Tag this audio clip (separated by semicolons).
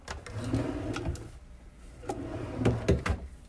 close; drawer; open